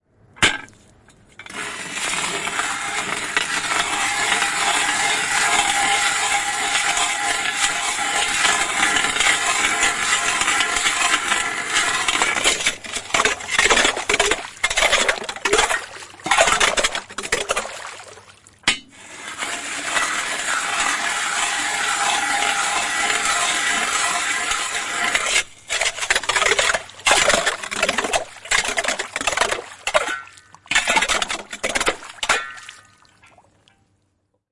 Kaira, jääkaira, jää / Ice drill, two holes in the ice are made with a drill
Kairataan kaksi reikää jäähän, avannon teko. Veden ääniä.
Paikka/Place: Suomi / Finland / Vihti / Haapakylä
Aika/Date: 24.11.1980
Field-Recording, Finland, Finnish-Broadcasting-Company, Ice, Soundfx, Suomi, Talvi, Tehosteet, Winter, Yle, Yleisradio